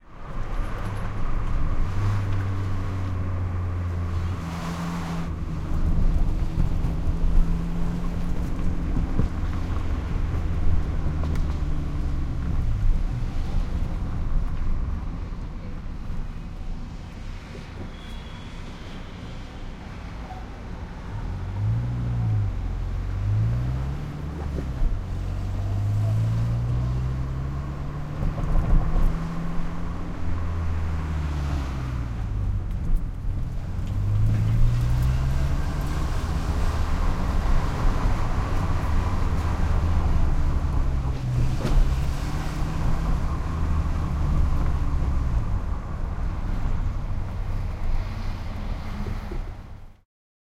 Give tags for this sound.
interior window 206 peugot dynamicly

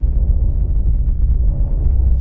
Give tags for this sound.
ambient atmosphere background

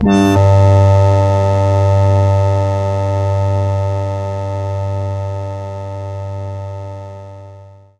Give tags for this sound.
multisample organ ppg sustained

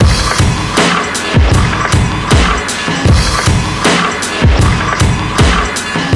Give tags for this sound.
break drums heavy

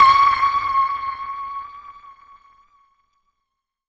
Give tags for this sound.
250 asdic atm audio ball game manipulated media melodic melodyne microphone millennia note notes percussive ping pong preamp processed sample scale sonar sport table technica tennis tuned

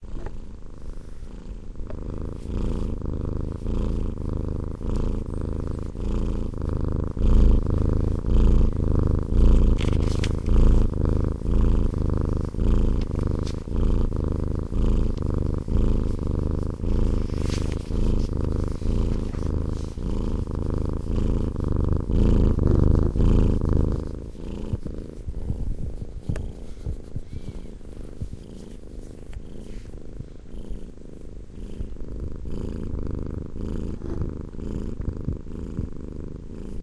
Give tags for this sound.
purr animal cat meow

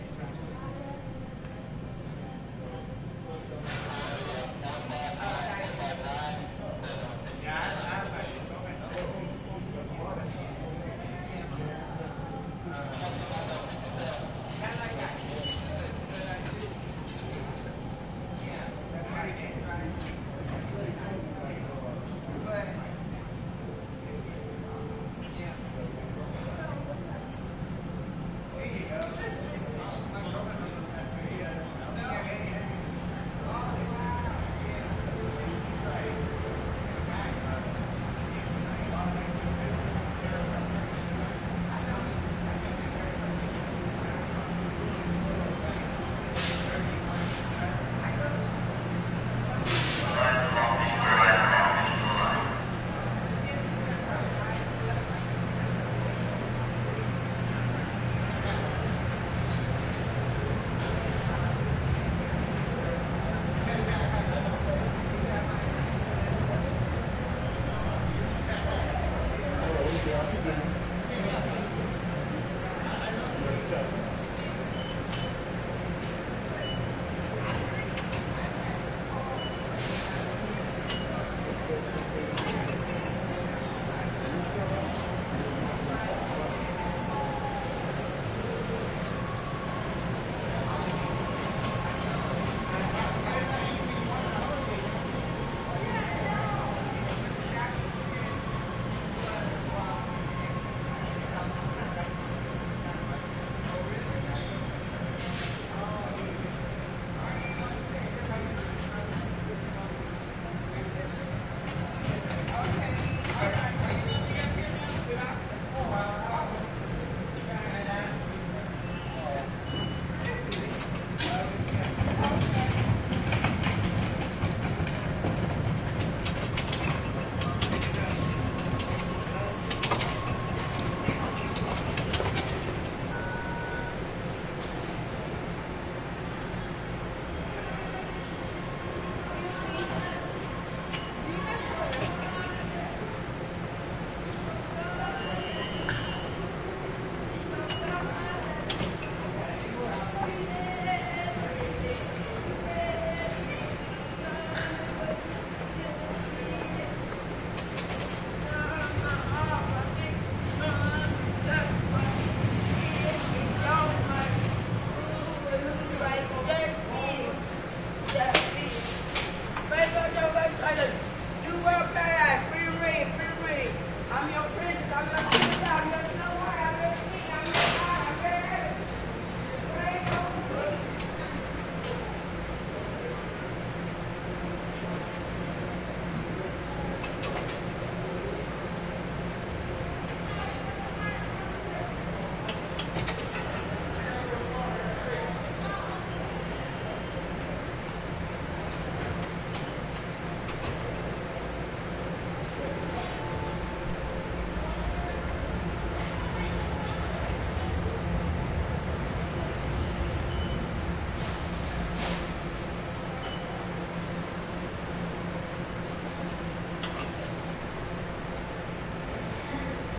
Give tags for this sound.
ambience ambient city downtown los people recording urban

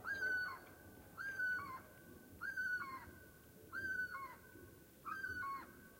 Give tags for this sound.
birds field-recording nature night south-spain spring

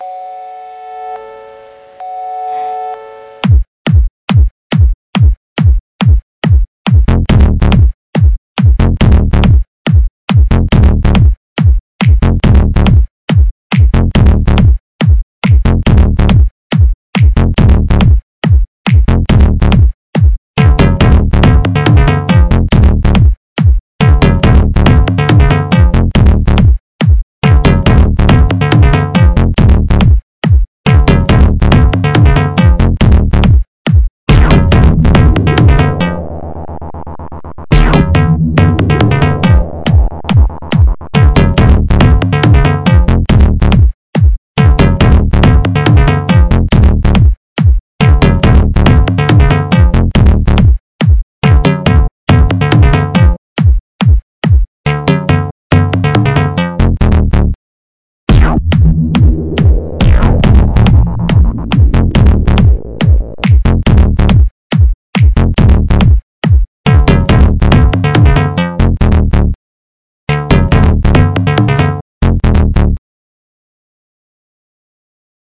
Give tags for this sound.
cool free game games german horror instrument loop music nazi synth techno video zombie zombies

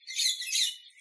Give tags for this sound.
aviary bird birds exotic jungle rainforest tropical weaver zoo

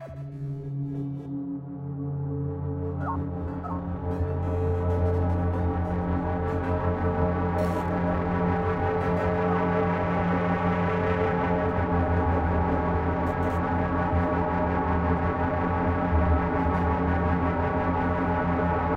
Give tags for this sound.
ambeint cinema experimental glitch idm processed soundscape

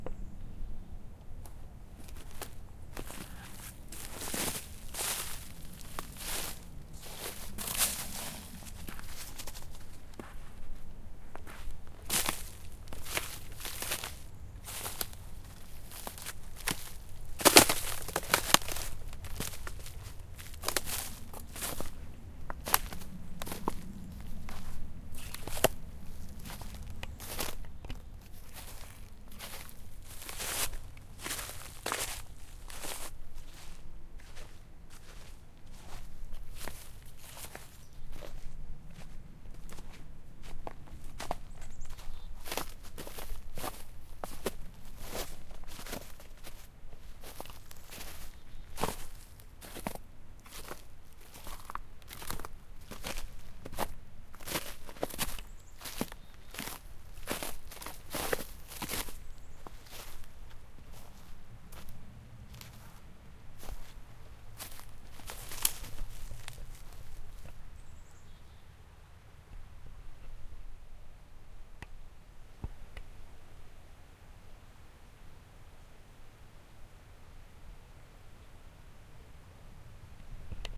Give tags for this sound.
branches breaking snow walk winter woods

